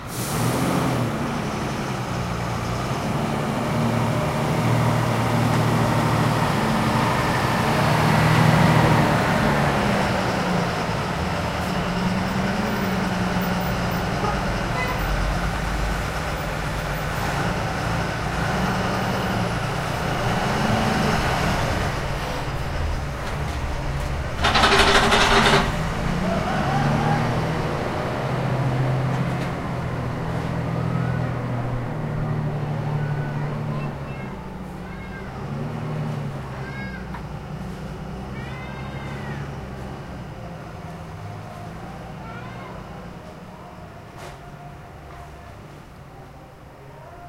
Garbage truck passing with ambient noise
Garbage truck passing on the street with thumping metal noise, ambient noise, car honking and people talking. Recorded with Zoom H1.
Caminhão de lixo passando na rua com barulho de metal batendo, ruído ambiente, carro buzinando e pessoas falando. Gravado com Zoom H1
engine, rubbish, banging, truck, slamming, metal, garbage